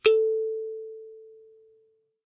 Kalimba (note A)
A cheap kalimba recorded through a condenser mic and a tube pre-amp (lo-cut ~80Hz).
Tuning is way far from perfect.
african, ethnic, instrument, kalimba, piano, thumb, thumb-piano